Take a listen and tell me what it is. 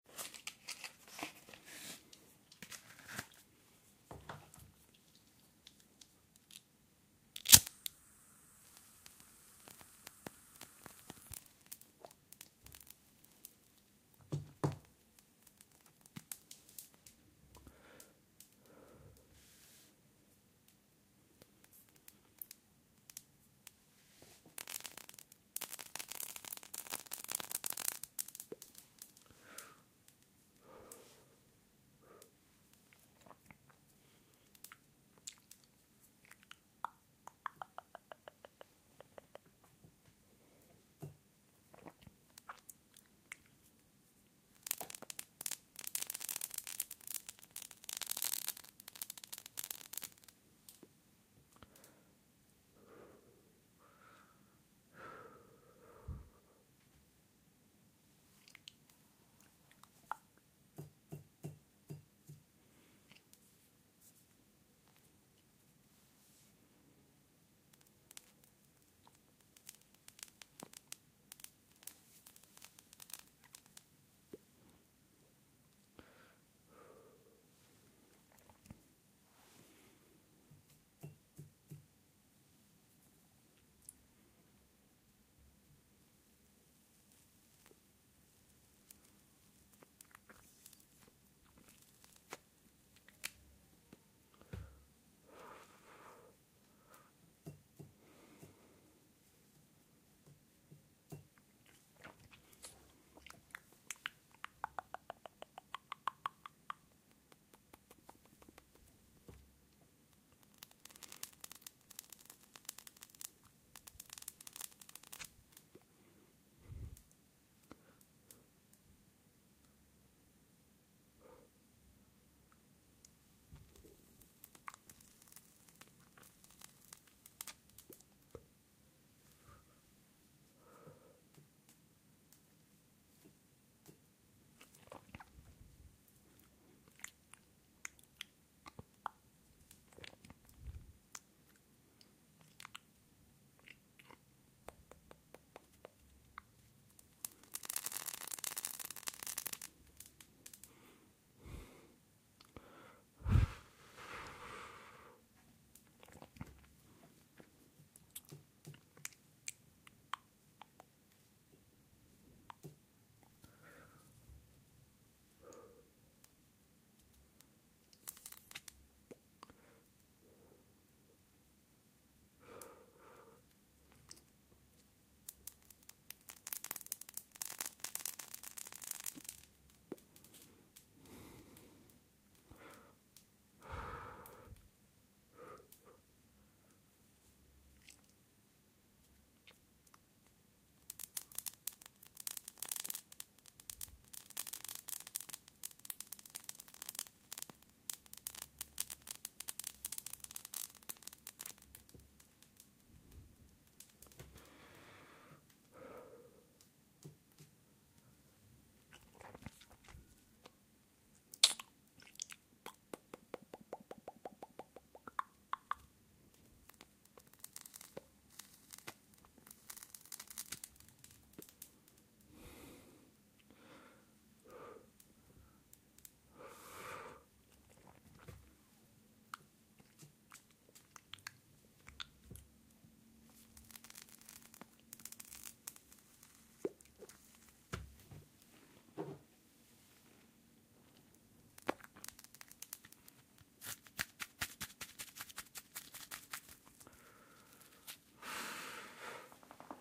Content warning
breath, inhale, smoke, smoking